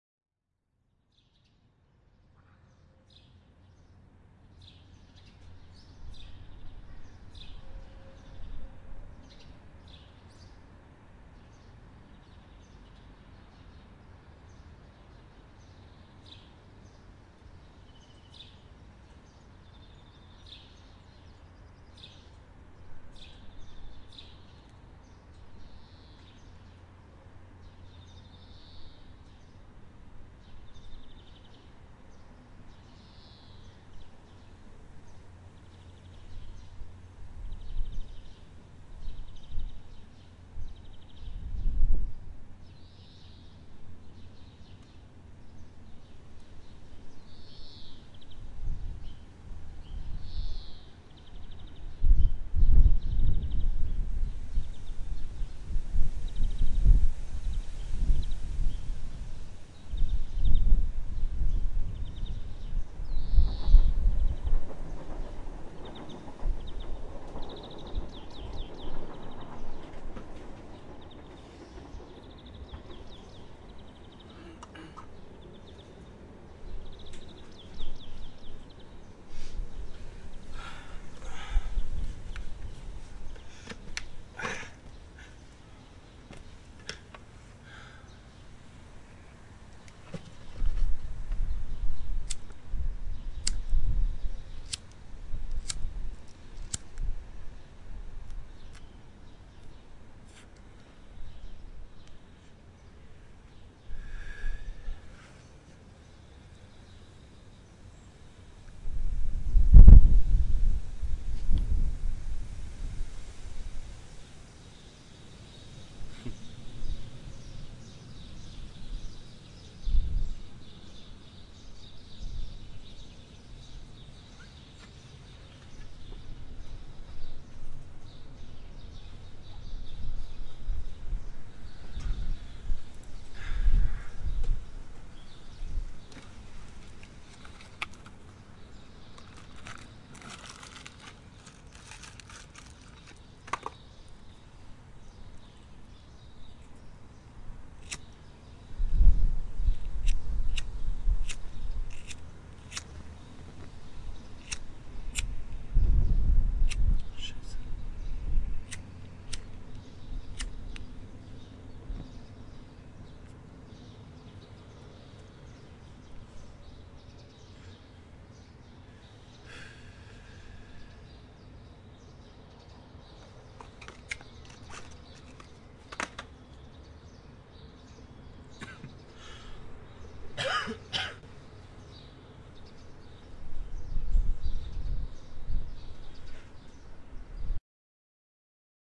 A U87 microphone hung from the window of my apartment in Hamburg.
coughing, atmosphere, german, sigh, cuss, wind, cigarette, light, curse, a, train, birds, swear